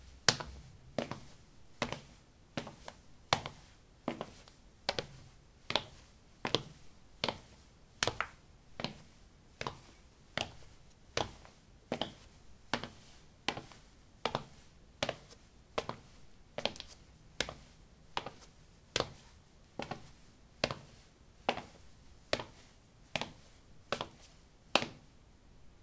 ceramic 11a sneakers walk
Walking on ceramic tiles: sneakers. Recorded with a ZOOM H2 in a bathroom of a house, normalized with Audacity.
steps, footstep, footsteps